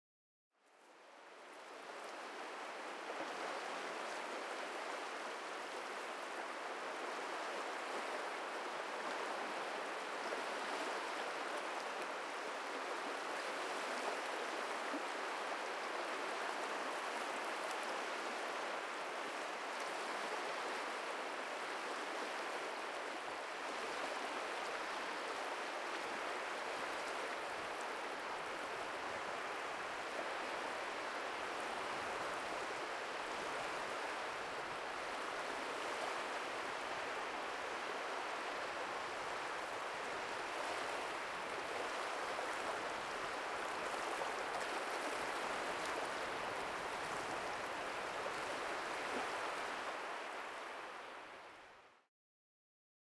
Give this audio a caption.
WAT ocean water beach
Recorded with the ZOOM H2 at Paradise Cove at Freeport, Bahamas. Rolled off some low end.
bahamas, beach, waves, water, ocean